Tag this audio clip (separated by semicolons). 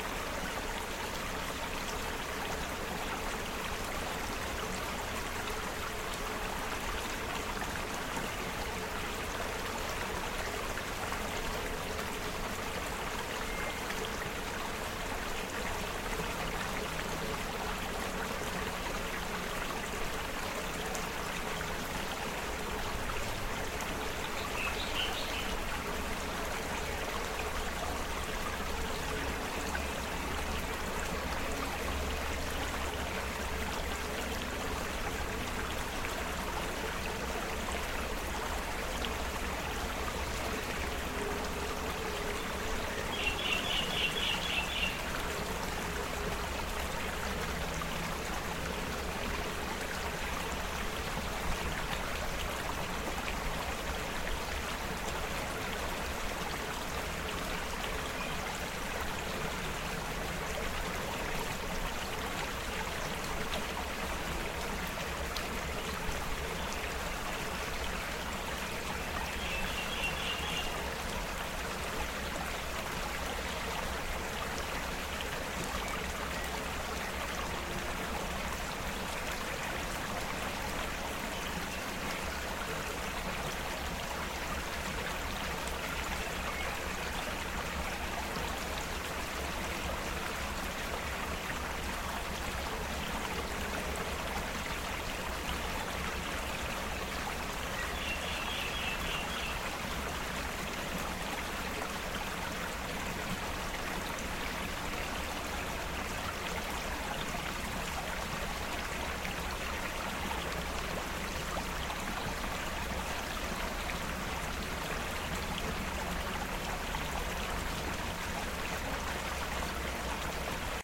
brook; creek; flowing; river; small; stream; water